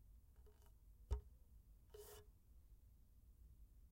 A hand slides on a table as character walks past